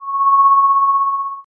DUSSAUD JESSY 2015 2016 holophonicsounds
Synthetic sound created with Audacity.
I use fade in & fade out to give a 3D impression.
Typologie de Schaeffer : V
Morphologie :
Masse : Son seul complexe
Timbre Harmonique : acide
Grain : son lisse et flottant
Allure : pas de vibrato
Dynamique : l'attaque est graduelle
Profil mélodique : sans séparation nette
Profil de masse : Le son est fait pour être fondu dans une ambiance
artificial, drone